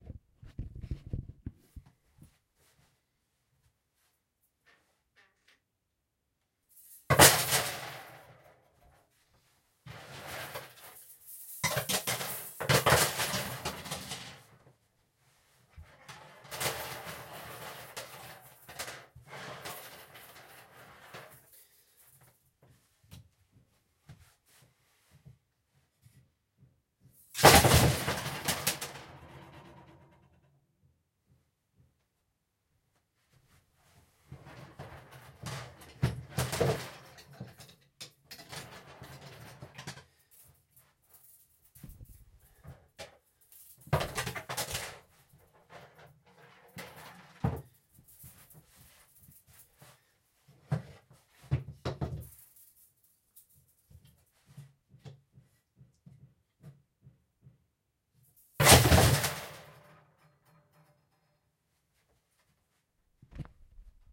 Coat hangers dropped on a hardwood floor. Recorded with a Zoom H1.
clothes, Foley-recording, indoors